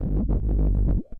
sherman cable33
I did some jamming with my Sherman Filterbank 2 an a loose cable, witch i touched. It gave a very special bass sound, sometimes sweeps, percussive and very strange plops an plucks...
cable, dc, analouge, analog, fat, sherman, ac, electro, touch, current, filterbank, noise, filter, phat